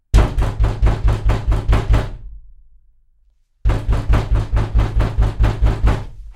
Golpes puerta
Someone kicking the door
door, kick, knock